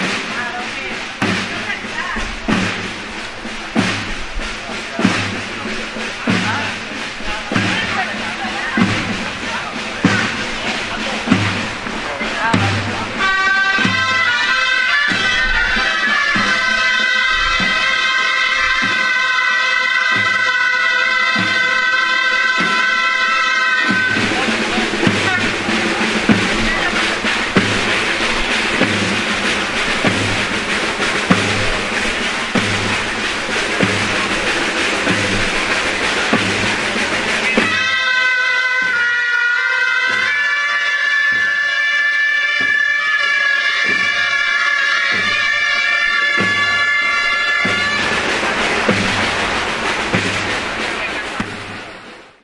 Grallers, matinades
This sound recorded with an Olympus WS-550M is the sound of a group of people who play noisy flutes in the early morning in the street.
flutes, mornings, noise